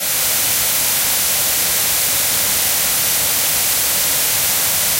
ind white noise parking garage
Independent channel stereo white noise created with Cool Edit 96. 3D echo chamber effect applied to give you an idea how hard it would be to listen to ghosts in a parking garage.
noise, white, stereo